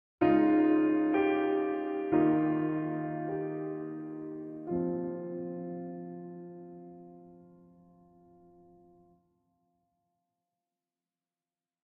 A phrase starting with some positive energy that gets lost by the end.
loss, melancholy, sadness